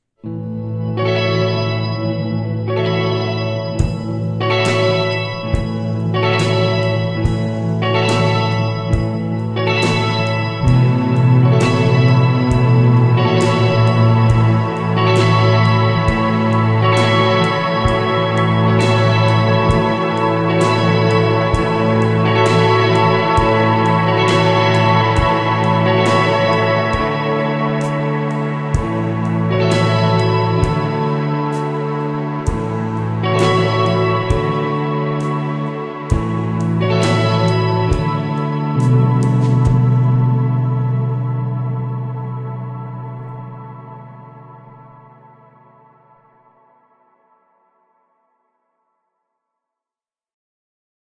Vibrant Steps: Sparkling Indie Shoegaze Intro Music
commercial, film, guitar, heavenly, podcasts, reverb, score